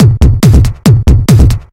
TECHNO loop 4 U
techno loop mash up sound